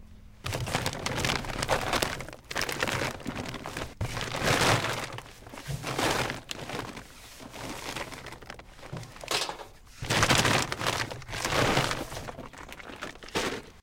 03 hard plastic bag; close
Close; open space; farm
loud plastic bag
open, space, Close, farm